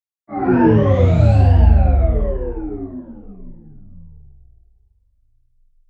CP Power Down01
Powering down your futuristic vehicle... or robot? I dunno. This is a low freq. version.
sci-fi, Power, Down, Mechanical, MOTOR, Fall